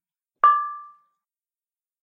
talempong pacik 04
Traditional musical instrument from West Sumatra, a small kettle gong played by hitting the boss in its centre
bells, gong, indonesia, minang, pacik, sumatra, talempong